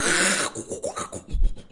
Cameroon AT ME06 creou keu keu keu keu
Sound created by André Takou Saa in Foumban, Cameroon